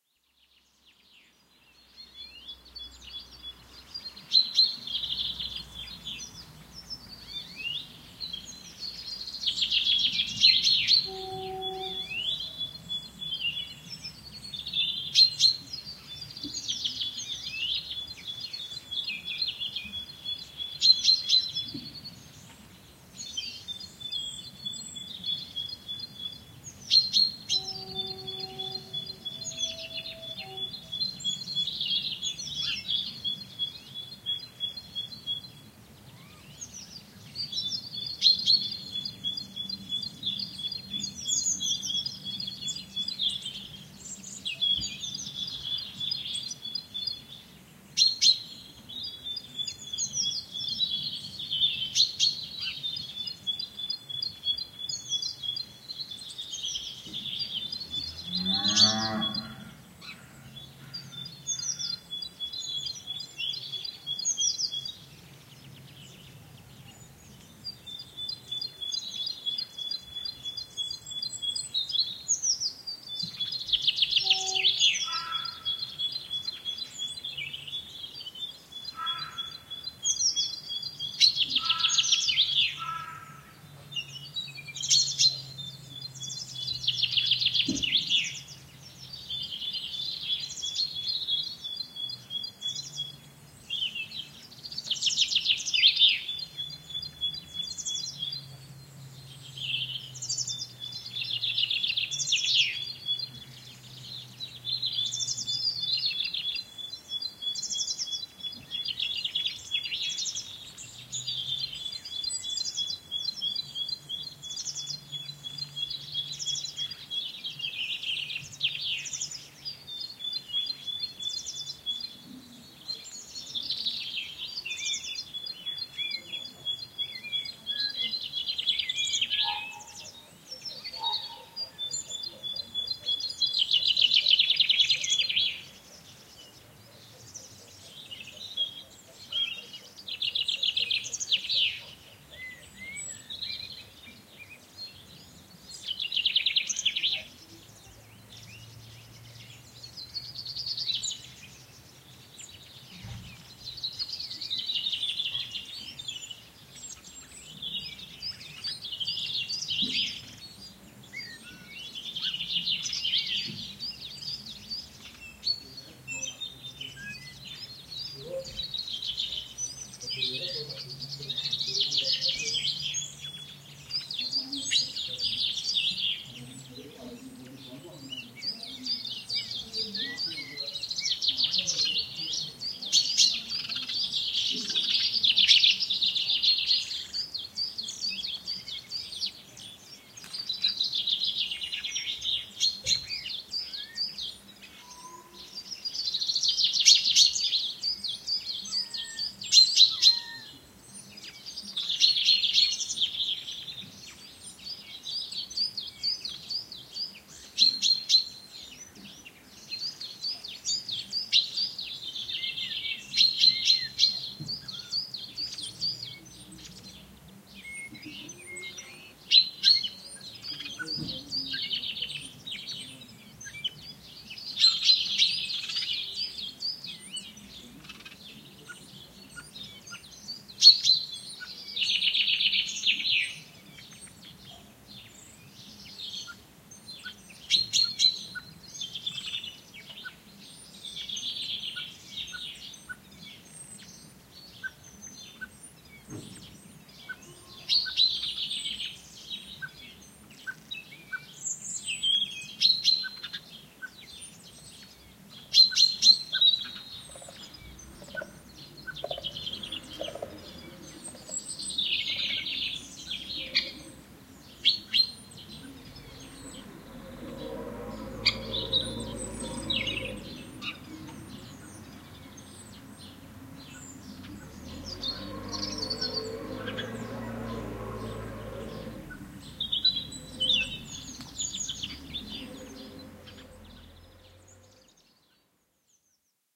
Farmyard Ambience
A stereo field recording of a farmyard at springtime. Rode NT4 > FEL battery pre amp > Zoom H2 line in.
xy, cow